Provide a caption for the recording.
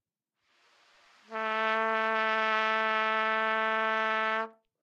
Part of the Good-sounds dataset of monophonic instrumental sounds.
instrument::trumpet
note::A
octave::3
midi note::45
tuning reference::440
good-sounds-id::1411
Intentionally played as an example of bad-attack-air